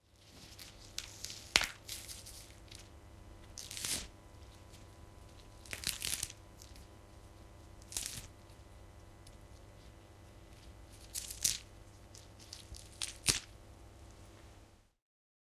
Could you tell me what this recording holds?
A lot of sound design effect sounds, like for breaking bones and stuff, are made from 'vegetable' recordings. Two Behringer B-1 mics -> 35% panning.